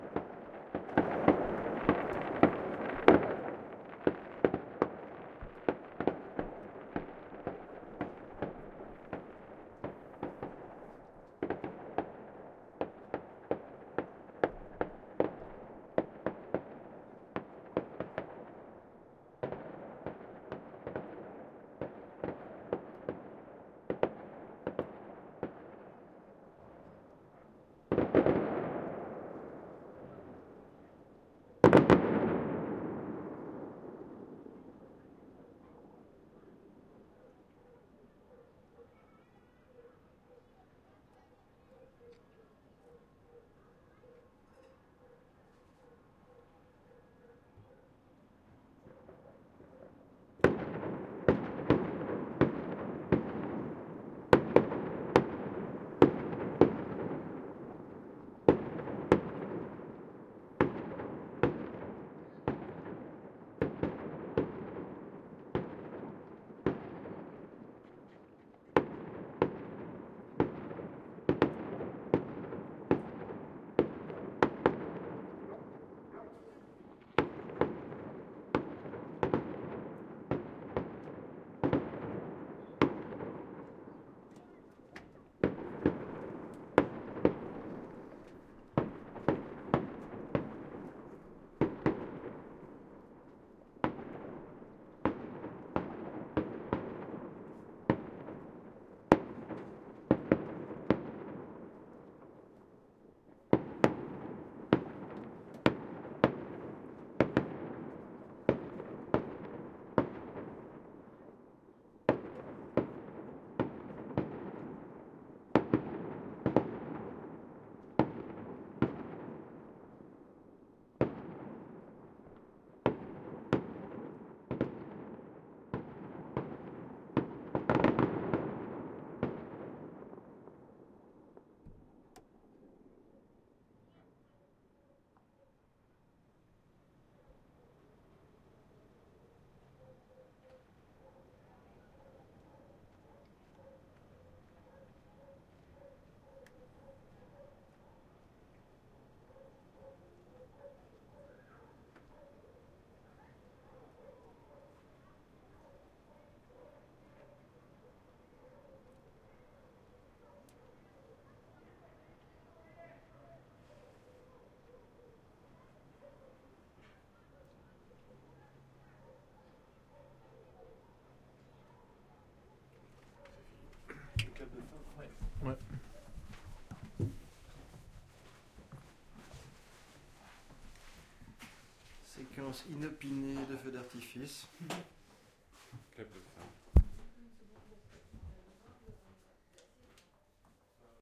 Fireworks hundred meters away in broad courtyard in between tall buildings
Fireworks recorded with MKH50+MKH30 MS stereo couple in Sound Devices 664 during shooting in Birobidjan, Russian Federation. Recorded on the 4th Floor of a building facing other buildings 50 meters in front and on the left in a residential area. Nice acoustics from that specific tall housing configuration.